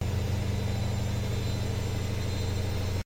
washing machine D (monaural) - Spin 4
field-recording, high-quality, washing-machine